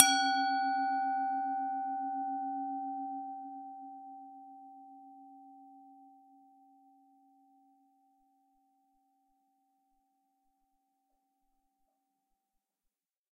Tibetan bowl left hit.
singing, field-recording, bowl, ringing, tibetan